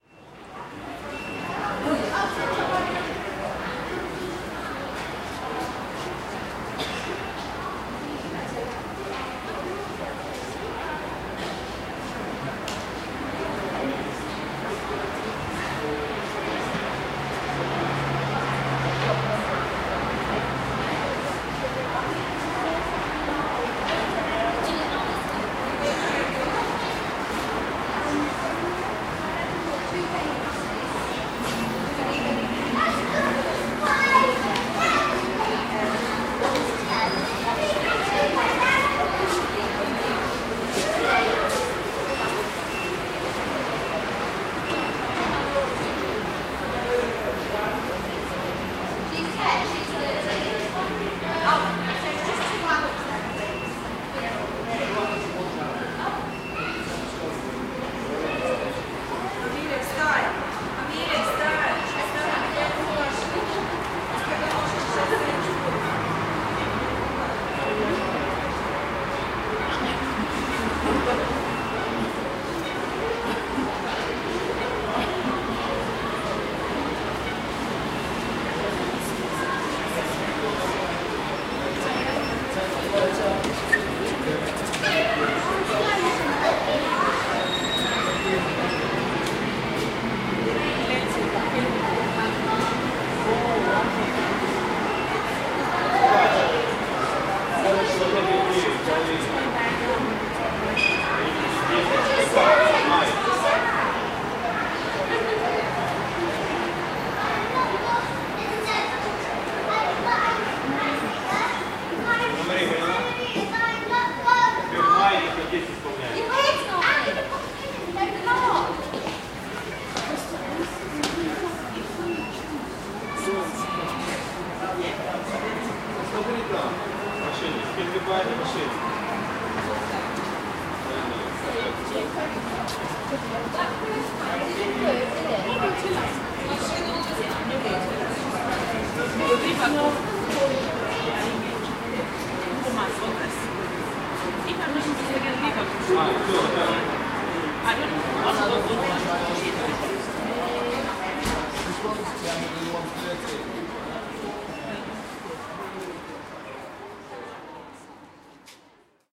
London Underground- Wembley Park station concourse
Concourse of an above-ground tube station. General conversation ambience, ticket machines and ticket gates being operated. Recorded 18th Feb 2015 with 4th-gen iPod touch. Edited with Audacity.